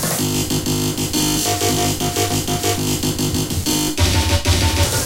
Symptom Treat 2
l, small